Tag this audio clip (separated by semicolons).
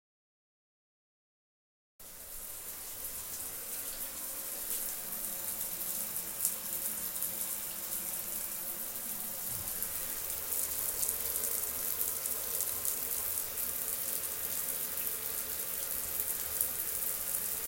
bathroom; CZ; Czech; Panska; shower; showering